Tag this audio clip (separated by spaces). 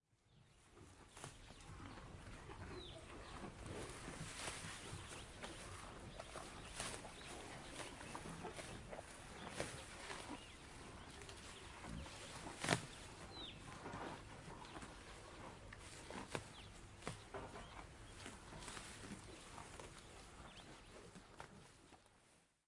agricultural cattle chewing cow cows eat eating farmland field grass grazing meadowland pasture rural